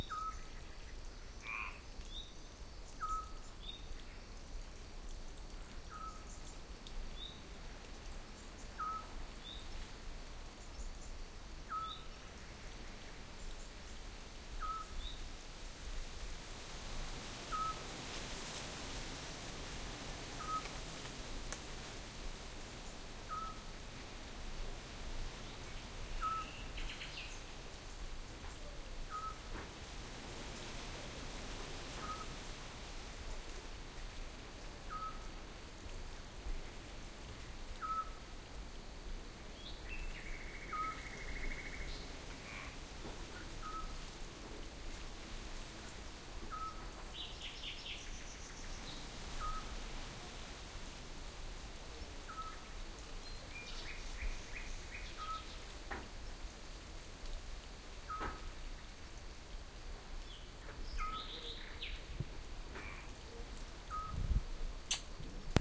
Ambiance in a forest in France, Gers.wind in the trees, many birds, distant frogs, distant streams. distant voices sometimes. Recorded A/B with 2 cardioid microphones schoeps cmc6 through SQN4S mixer on a Fostex PD4.